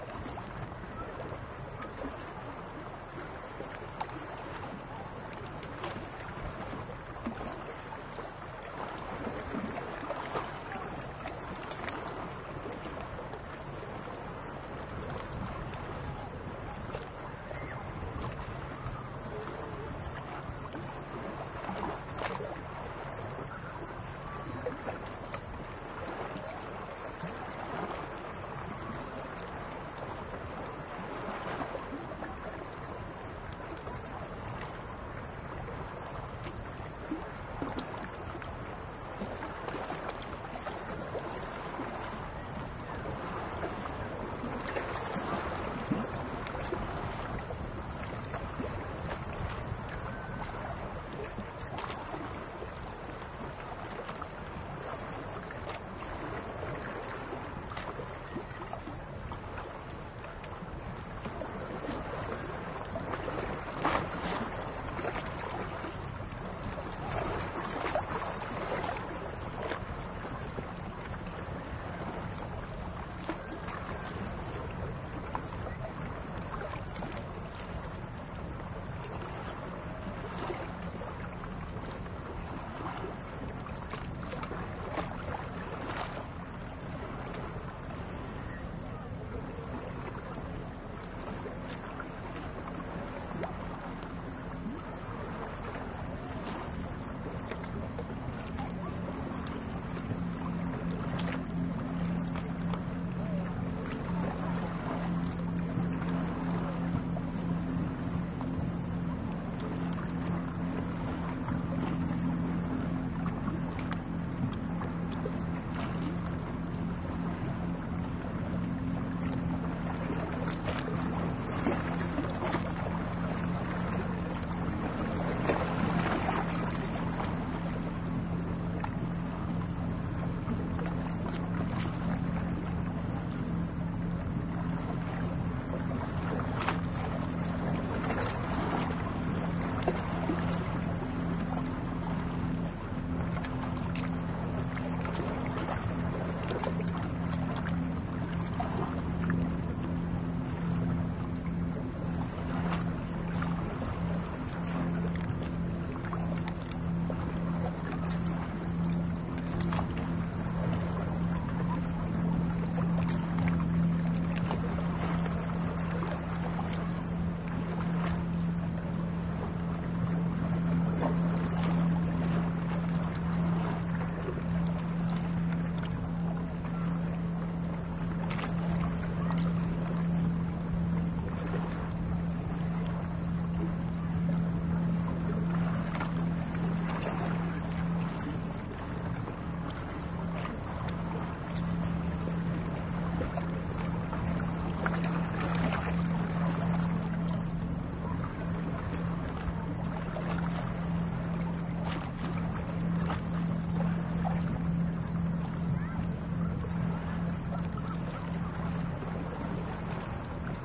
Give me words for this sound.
Wide-angle soundscape of Trovill Beach on the Swedish island of Sandhamn on the eastern outskirts of the Stockholm Archipelago. It is a partly cloudy, windy day and a fair amount of people are at the beach, few are bathing. Some boats and ships pass by in the mid distance. The recorder is situated directly at the shore, facing the water from a distance and height of about 1m.
Recorded with a Zoom H2N. These are the REAR channels of a 4ch surround recording. Mics set to 120° dispersion.